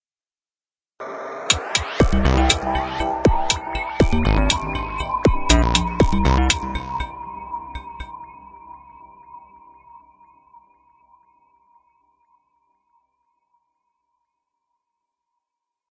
Short logo. cheers :)
electronic, short, jingle, logo, vsti, space